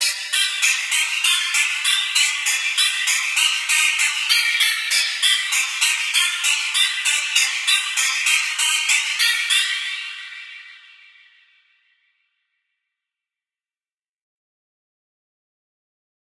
Melo2XX
-Cute and interesting tweaked synth "semi-vocal" sound making a sweet and moderately complex arpeggio-type melody at around 100 bpm.
-Could be used as intro or maybe the drop.
Maj, ref, commercially, pattern, wet, Intro, progression, semi-vocal, quality, Melody, processed, synth, Original, loop